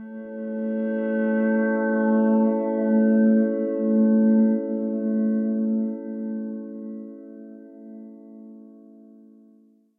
Remodel of noise. Sounds like UFO.